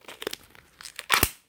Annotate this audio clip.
Balloon Sample 24
Recording of a rubber balloon.
I wanted to see what I could capture just using my phone's internal microphone(s).
Simple editing in ocenaudio.